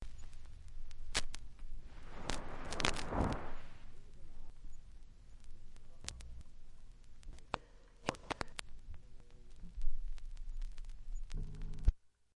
BnISI side2
LP record surface noise.
album, crackle, surface-noise, turntable